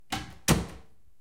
Wood door closes